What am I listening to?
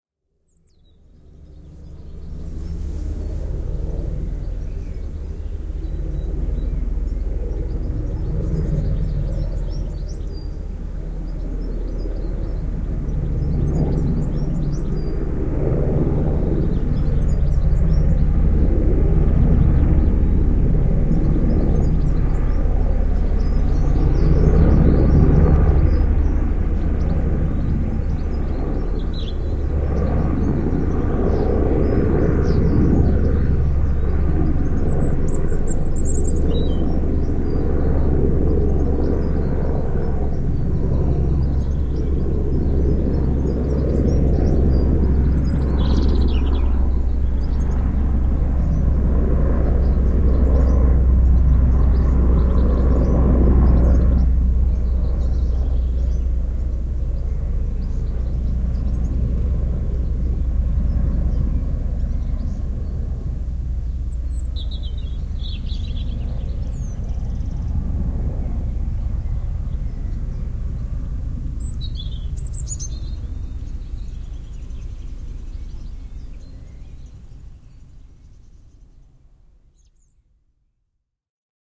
Sitting in a field close to Culdrose Naval Airbase Cornwall, 26 May 2020,Helicopter with competing Birdsong